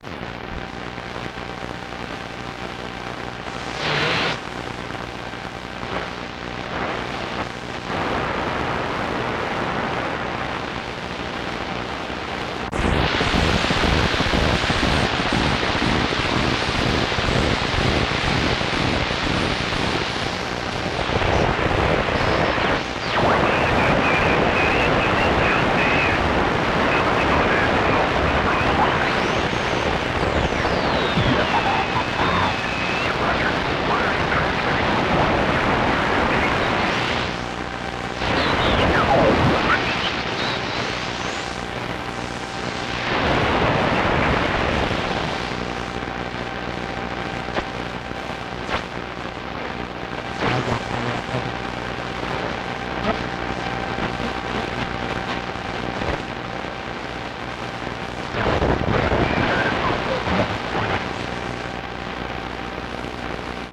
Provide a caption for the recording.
Radio Noise 10
Some various interference and things I received with a shortwave radio.
Noise, Radio-Static, Interference, Radio, Static